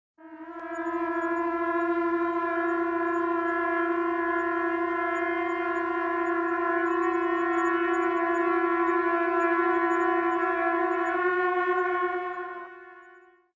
trumpet chorus
trumpet processed samples remix
chorus, transformation, trumpet